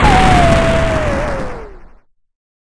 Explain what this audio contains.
SFX Explosion 10
retro video-game 8-bit explosion
video-game, explosion, 8-bit, retro